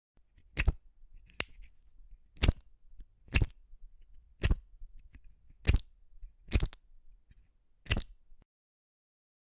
fire flame burn
burn, burning, fire, flame, flames